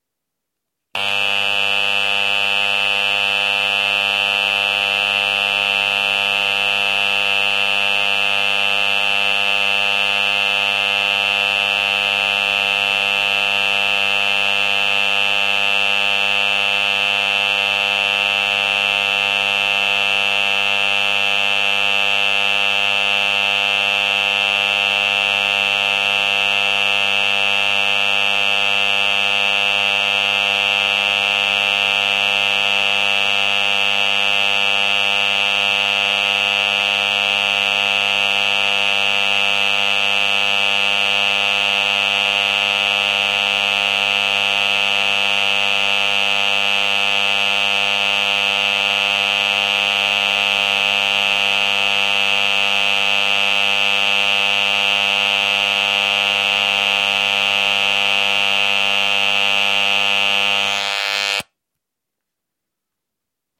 Electric Hair Clipper
A mono recording of a mains AC (50Hz) powered hair clipper. There are harmonics in 50Hz increments upwards. Rode NTG-2 > FEL battery pre-amp > Zoom H2 line in.